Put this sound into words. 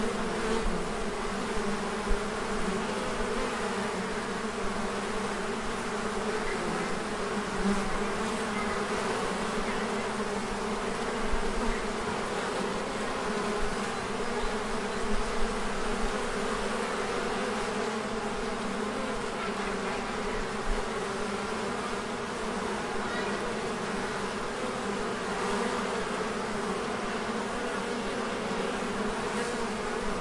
Recording of a beehive with Zoom H1